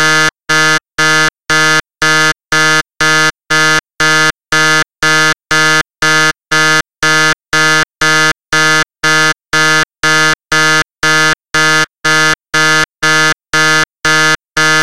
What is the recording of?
The curve has been draved in Audacity and edited